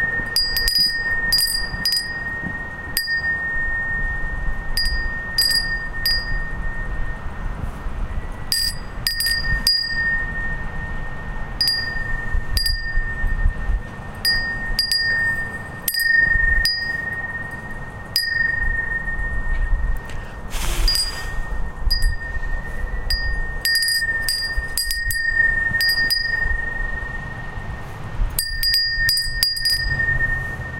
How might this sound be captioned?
Some nice sounding ceramic wind chimes from our family home.
This audio was recored with my Olympus DM-520.